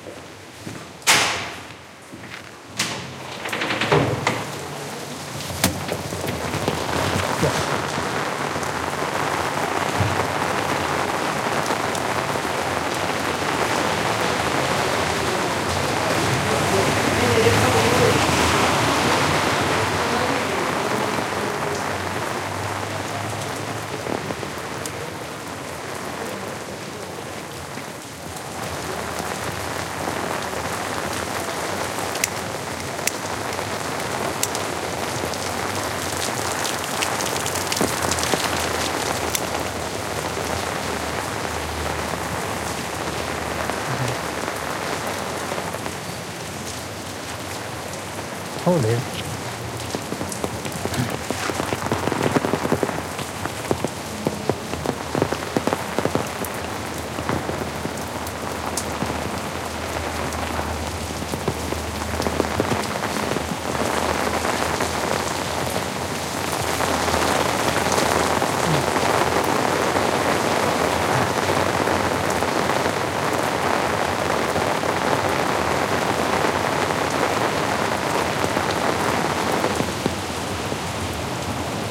20091216.coming.back
Squeaking door opens, then I walk under heavy rain. Mostly noise of drops falling on umbrella, clicks of a lighter. Shure WL183 capsules, Fel preamp, Olympus LS10 recorder. Registered during the filming of the documentary 'El caracol y el laberinto' (The Snail and the labyrinth), directed by Wilson Osorio for Minimal Films